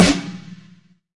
snare rock
dw snare, Shure SM 57LC (a snare mic),
recorded on WaveLab (digital audio editor)
hit, drumkit, kit